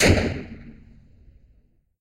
Shooting Sounds 037
gameaudio, futuristic, laser